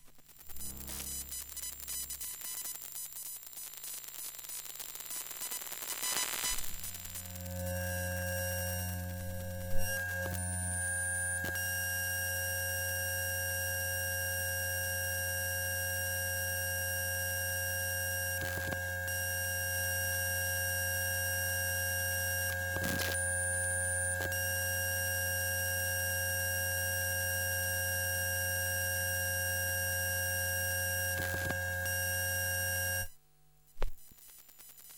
EMF macbook white unibody data transmission subtle with stereo flying glitches and high tones
This sound effect was recorded with high quality sound equipment and comes from a sound library called EMF which is pack of 216 high quality audio files with a total length of 378 minutes. In this library you'll find different sci-fi sound effects recorded with special microphones that changes electro-magnetic field into the sound.
designed, sound, zap, electric, field, transfer, magnetic, shock, electricity, 3, piercing, fiction, data, computer, laboratory, glitch, processing, effect, glitches, science, dual, transferring